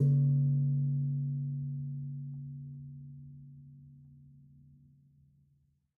A large metal pot suspended by a string is hit softly with a dampened mallet, producing a smooth and quiet attack with an even resonance. (Recorded with a stereo pair of AKG C414 XLII microphones)